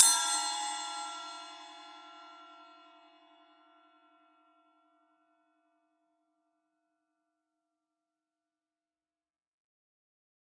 A 1-shot sample taken of an 8-inch diameter Zildjian EFX#1 Bell/Splash cymbal, recorded with an MXL 603 close-mic
and two Peavey electret condenser microphones in an XY pair.
Notes for samples in this pack:
Playing style:
Bl = Bell Strike
Bw = Bow Strike
Ed = Edge Strike